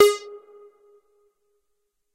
MOOG LEAD G#
moog minitaur lead roland space echo
space, echo, minitaur